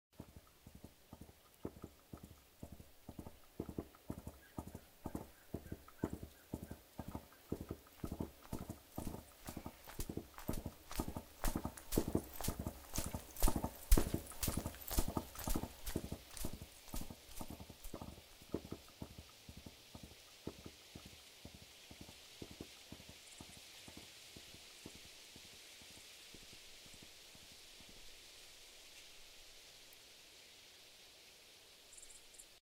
Horse galloping in the countryside. Foley , made from scratch
horse, galloping, gallop